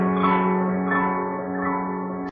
dist piano fragment 1
My Casio synth piano with distortion and echo applied. An excerpt from a longer recording.